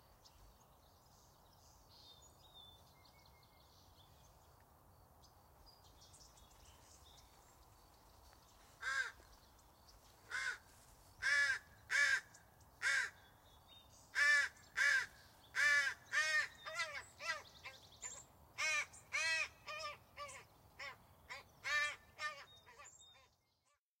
Crow cawing. Recorded with Rode NT3 and ZOOM H6